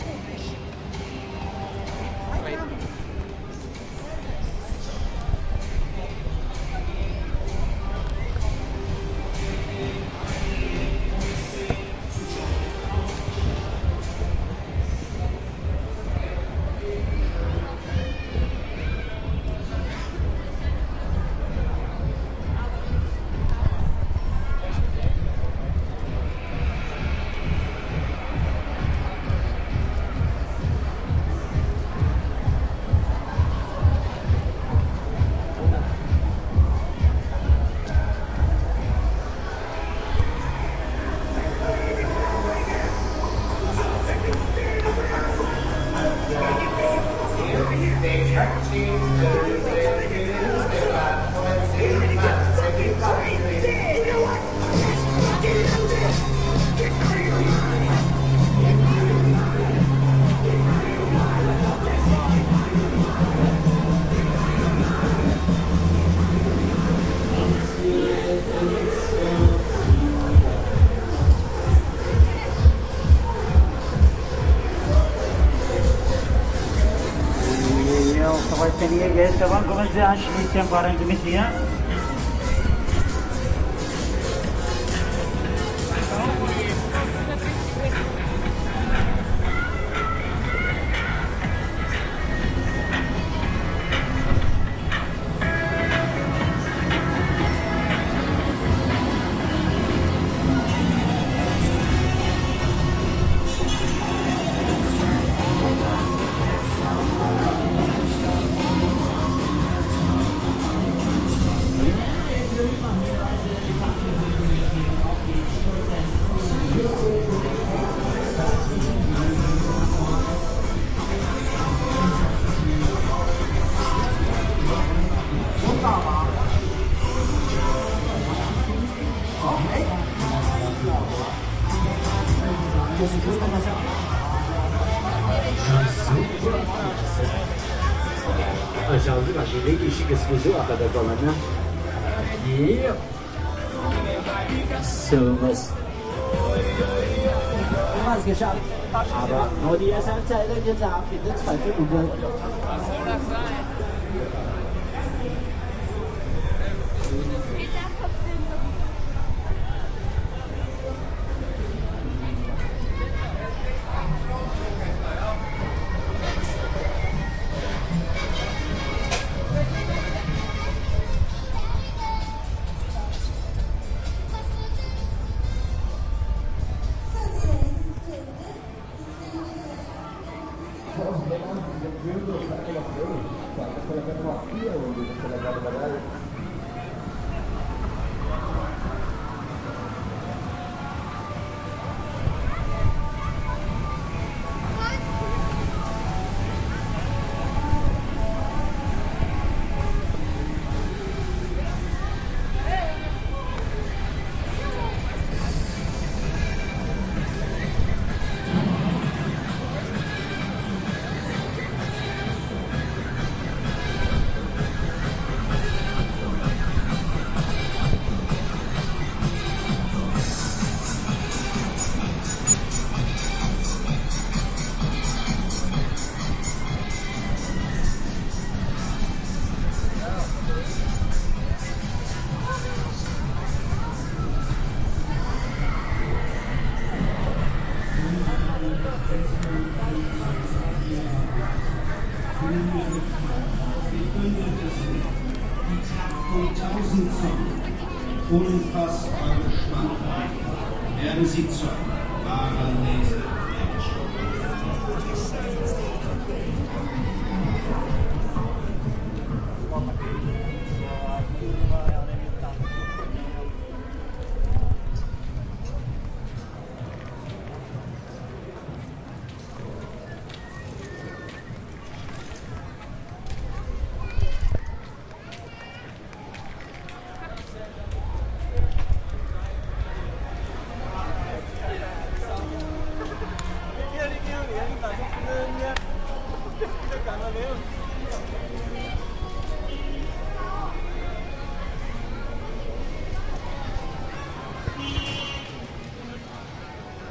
Recordings from "Prater" in vienna.
people, field-recording, prater, vienna, leisure-park